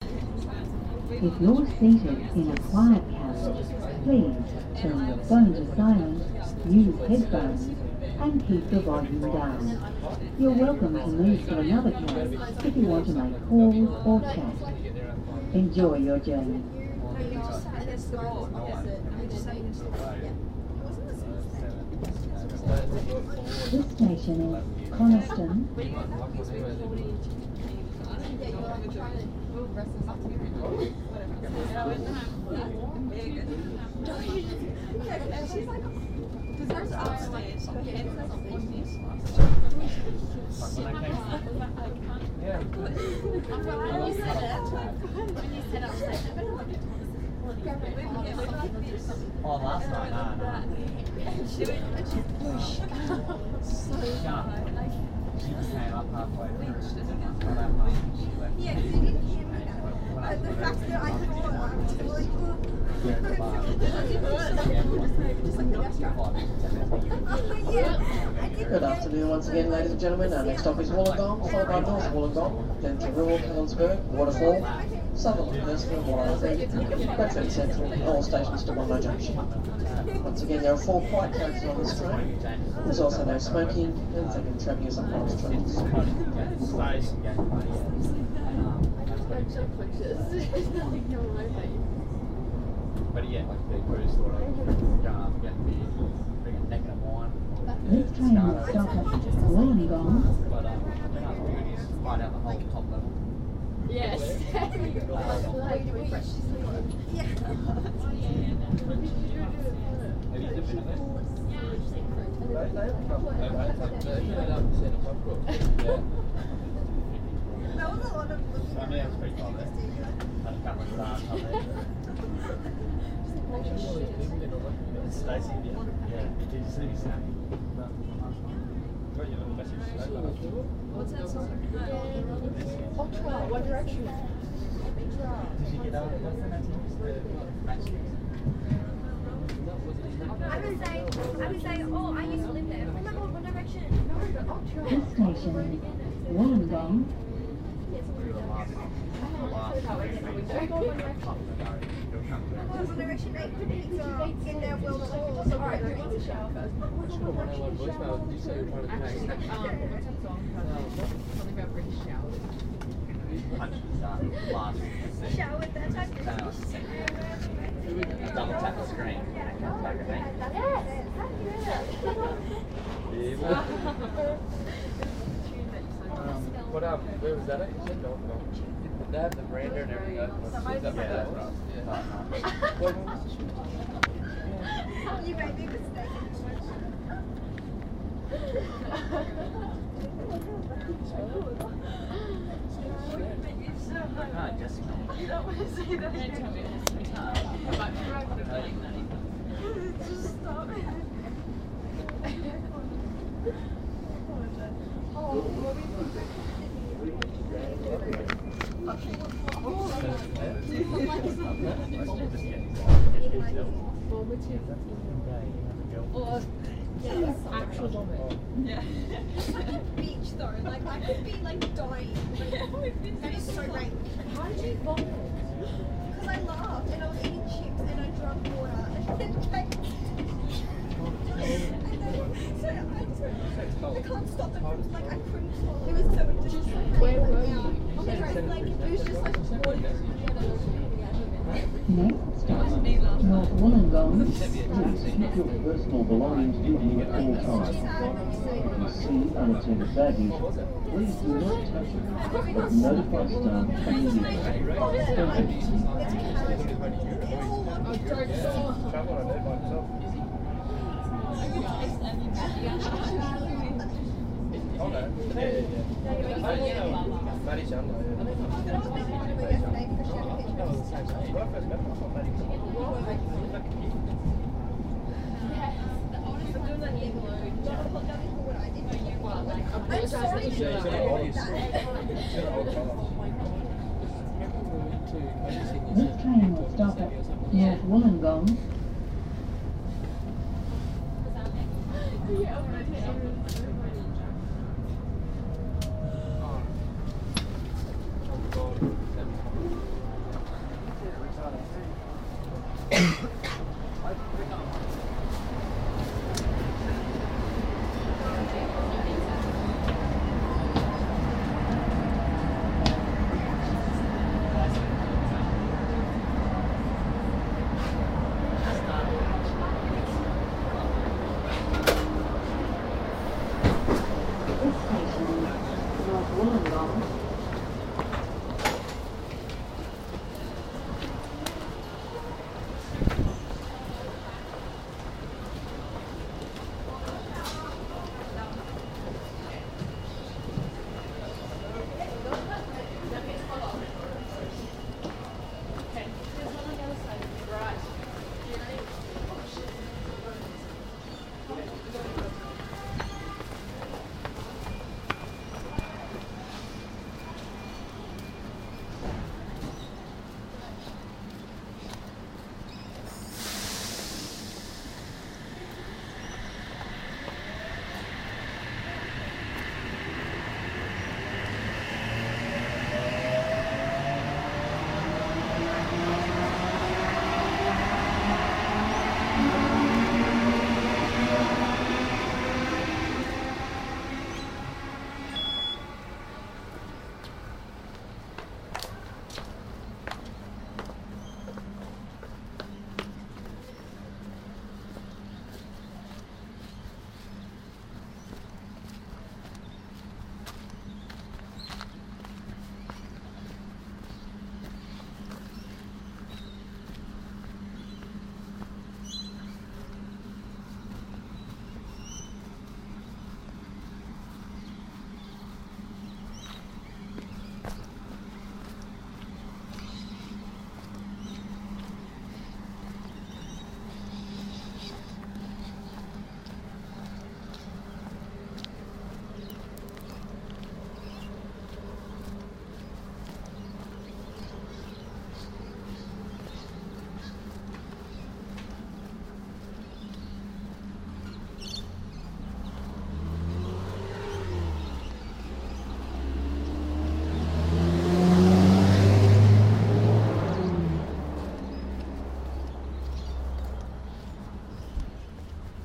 On a train line to Wollongong Station, Illawarra Coast, NSW, Australia, 17 June 2017.
cabin, electric-train, passenger, rail, railway, train, transport, travel